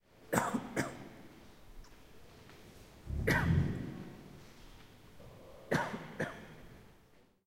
This sound is the recording of someone's cough inside the upf poblenou library. It is also hearable some background hum since the microphone was placed on a table and the person who was coughing was a meter in front of it. The recording was made with an Edirol R-09 HR portable recorder.